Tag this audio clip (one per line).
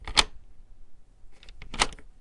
lock
door